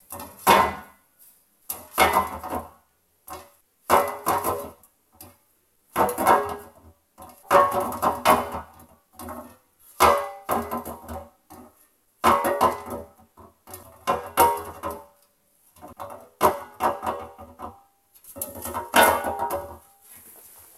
A sound of piece of metal hitting floor with reverberation.